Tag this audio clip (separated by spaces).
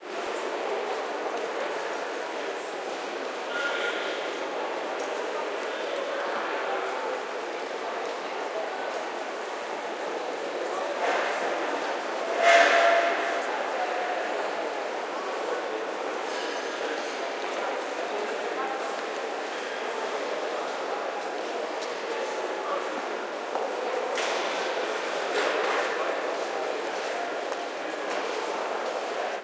brussels train ambient station